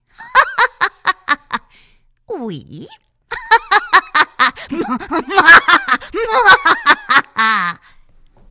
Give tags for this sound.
laugh
woman
witch

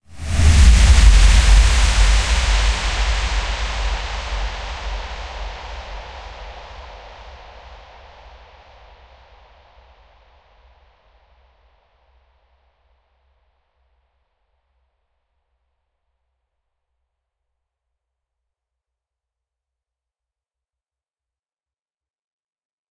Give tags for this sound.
action
awesome
budget
cinema
design
dope
epic
movie
orchestral
scary
sub
swoosh
thrilling
trailer

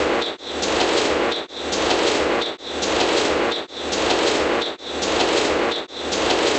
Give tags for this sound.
block
buzz
electronic
freaky
Lo
machine
noise
part
pulse
puzzle
remix
repeating
sound-design
system
weird
wood

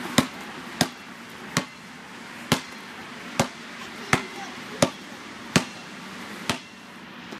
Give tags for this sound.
Ball
bounce

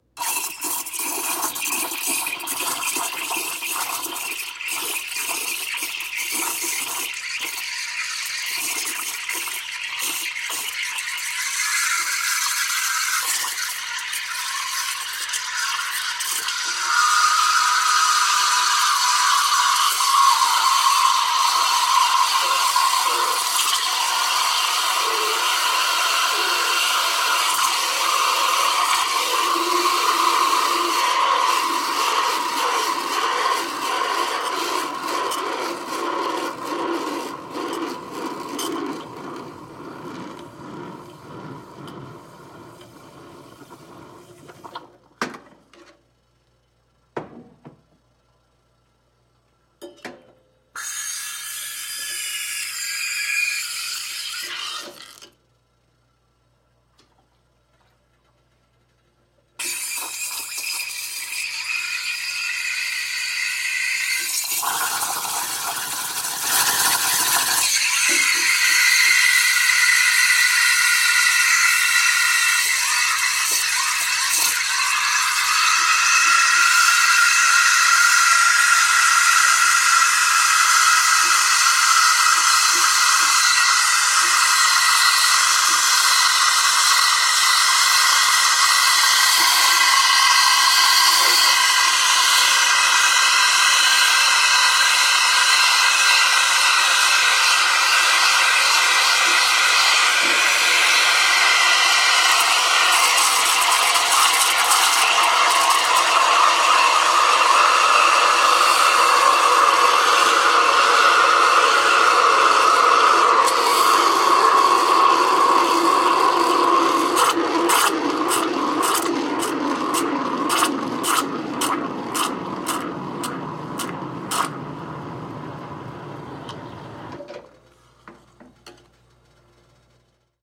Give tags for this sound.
espresso-machine
foaming
steam-wand

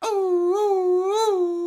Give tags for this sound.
Animal
Howl
Wolf

